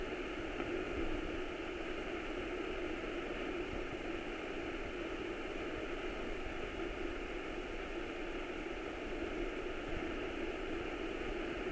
Foley Object Small Radio Interference Loop Mono 01
Loop of an old small radio.
Gear : Rode NTG4+
electric, electronic, interference, interferences, loop, noise, radio, small, static, white